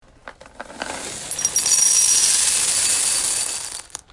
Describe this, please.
Cereal Pour
Me pouring cereal. Recorded on my iPhone8.
foley, high-pitch, rumble